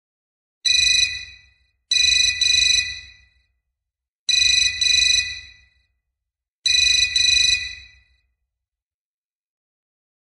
Telephone Ringing (Digital)
A digital telephone ringing